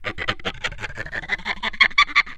happy.monkey.09
friction, instrument, wood, daxophone, idiophone